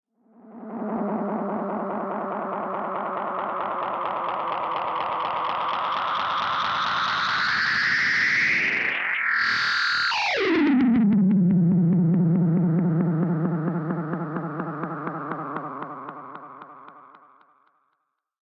Recorded my guitar amp. Used a analogue delay pedal to create the sound.